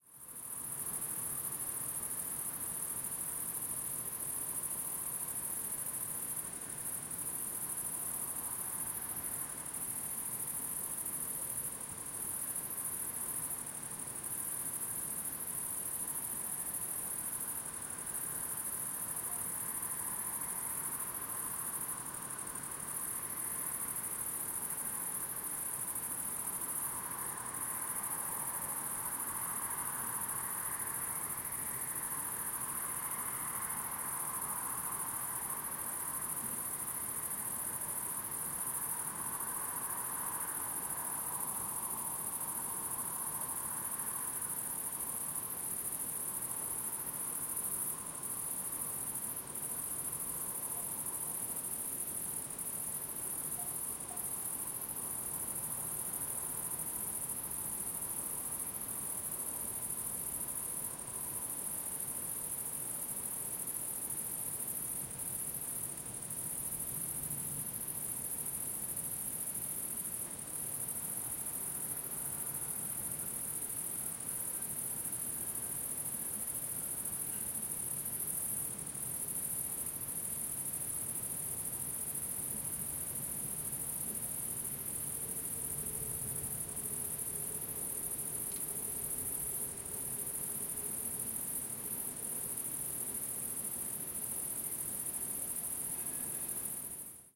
Recorded near a farmhouse with crickets in the garden. In the distance you can sometimes hear cars from a road.
Recorded in Gasel, Switzerland.